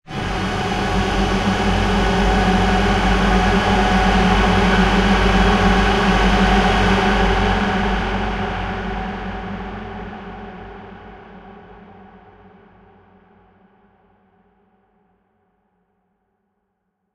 Result of a Tone2 Firebird session with several Reverbs.
dark, experimental, horror, noise, reverb